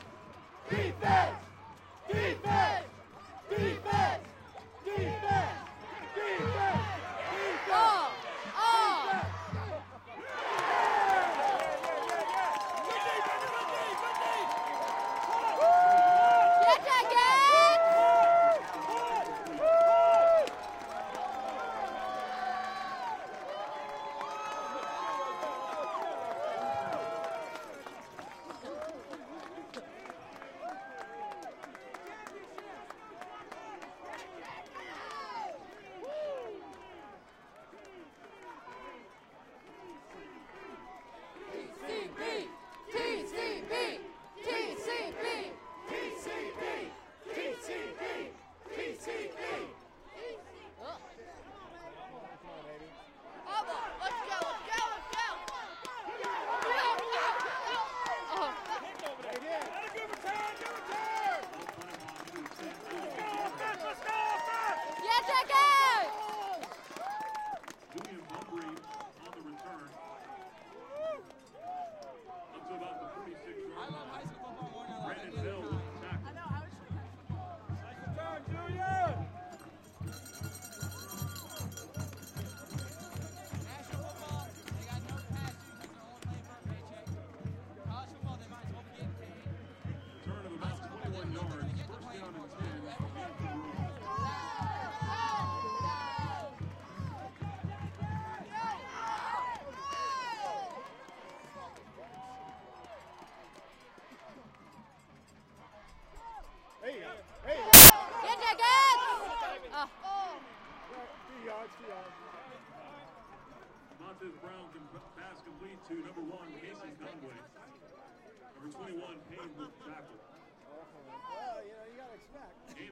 JMstatechampionshipgame3 defense LibertyUniversity Dec2011
The state championship game for VHSL AA div3: James Monroe (my alma mater) v Brookville at the Liberty University football stadium. The recording is taken on the James Monroe side of the stands. The crowd cheers for the defense, the band is playing, TCB is a common school cheer it simultaneously stands for "Tough City Boys" and "Taking Care of Buisness". I was a great game and the crowd was really into it here in the third quarter.
James-Monroe-High-School, TCB, cheering, crowd, defense, football, state-championship